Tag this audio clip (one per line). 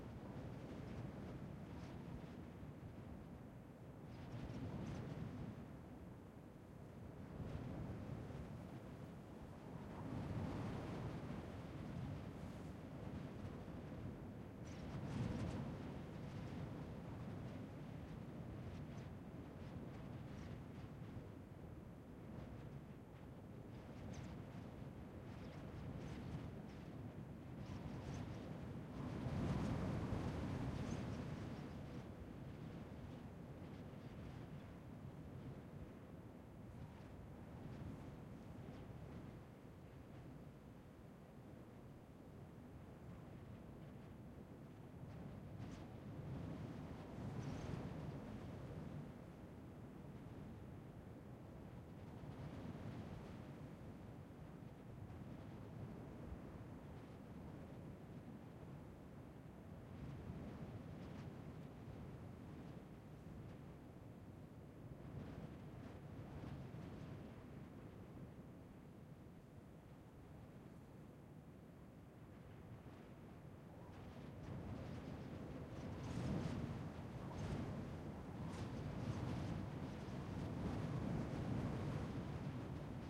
cold
medium
swirly
gusty
winter
blustery
wind